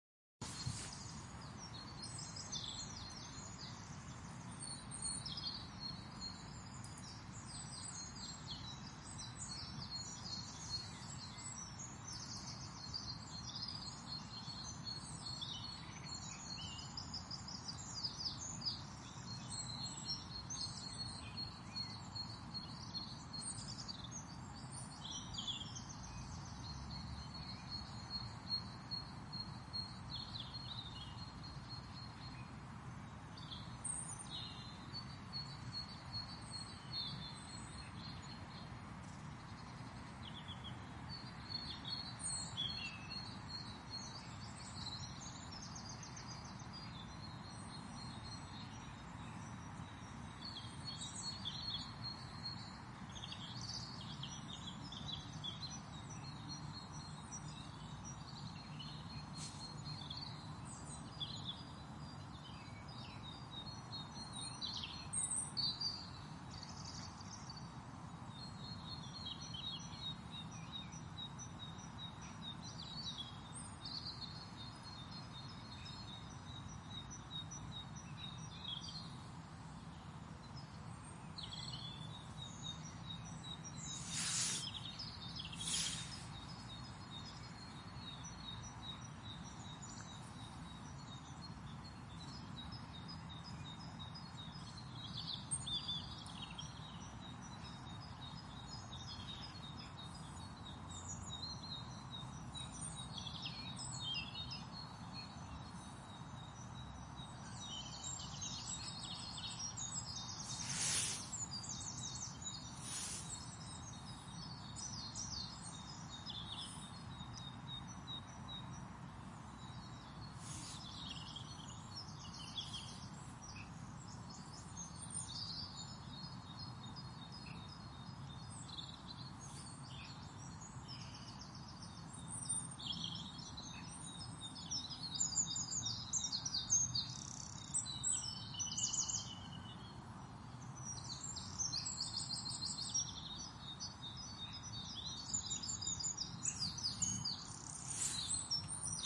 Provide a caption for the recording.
Birdsong in a park. Peaceful